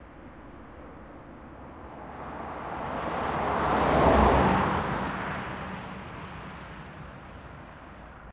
MERCEDES passing fast
Mercedes passing in front of a MS sett (sennheiser mkh 30 an mkh 50), this is allredy downmiksed so noe plugins needed.
motor, car, engine, vehicle